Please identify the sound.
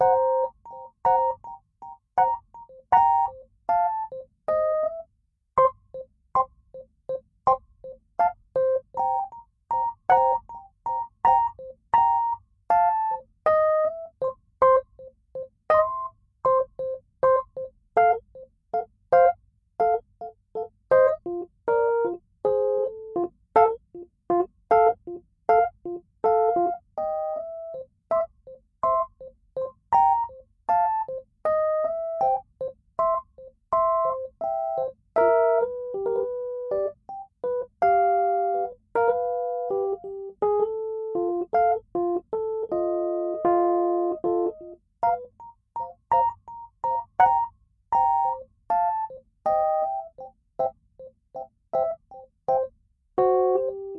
Song7 RHODES Do 3:4 80bpms
80, blues, loop, Rhodes, Do, bpm, Chord, rythm